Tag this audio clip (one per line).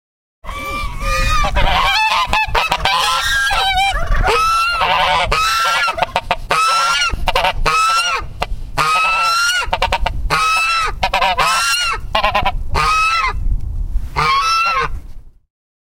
barnyard
geese